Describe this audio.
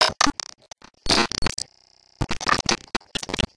clicks and pops 12
A strange glitch "beat" with lots of clicks and pops and buzzes and bleeps. Created by taking some clicks and pops from the recording of the baby sample pack I posted, sequencing them in Reason, exporting the loop into Argeïphontes Lyre and recording the output of that live using Wire Tap. I then cut out the unusable parts with Spark XL and this is part of the remainder.
noise, glitches, granular, clicks, beats, beat, pops, pop, idm, click, glitch